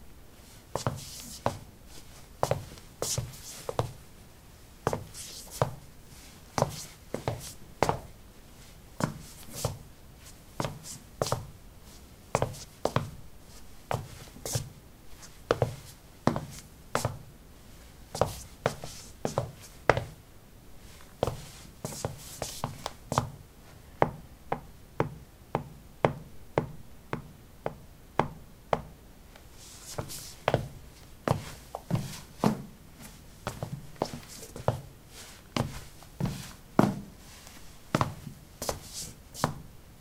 ceramic 10b startassneakers shuffle tap threshold
Shuffling on ceramic tiles: low sneakers. Recorded with a ZOOM H2 in a bathroom of a house, normalized with Audacity.
footsteps, steps